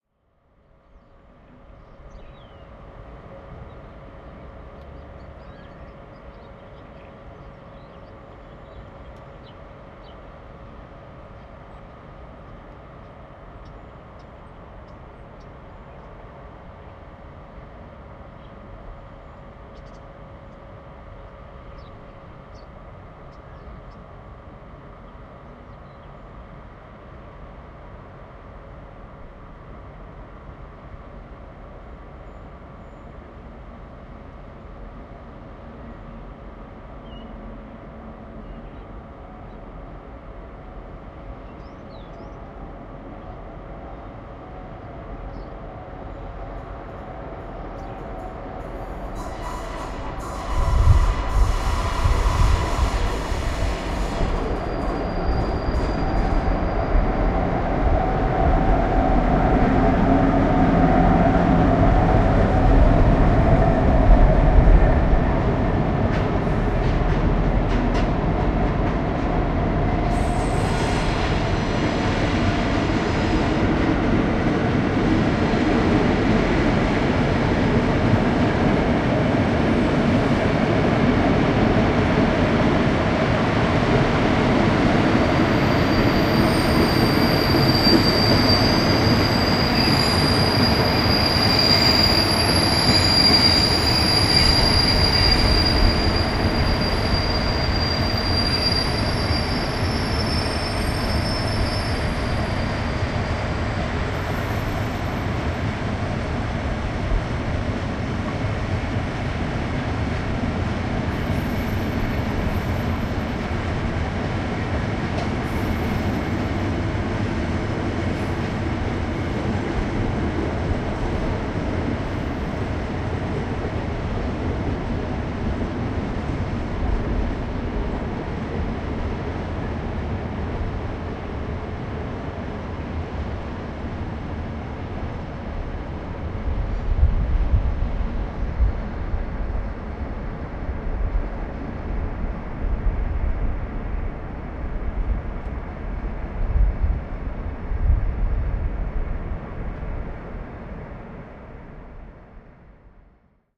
pociąg towarowy Poznań Starołęka 19.09.2018
19.09.2018: passing by cargo train, Poznan-Staroleka station, while waiting for the train to Łódź. Recorder zomm h1.
ambience, city, field-recording, noise, Poznan, railway, train, train-station